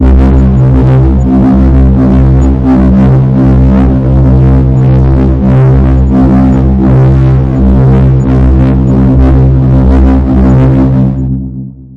12 ca pad b49
intro, score, atmos, music, horror, soundscape, atmospheric, atmosphere, white-noise, suspense, ambience, background-sound